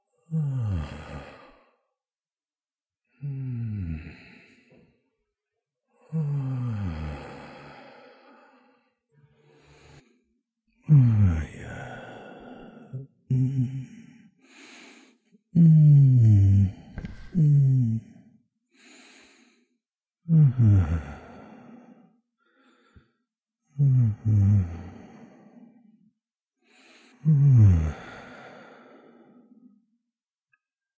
Human male huggin himself. Sounds of pleasure and delight.
male, hum